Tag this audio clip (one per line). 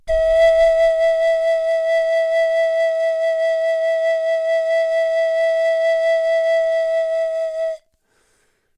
e2
pan
pipe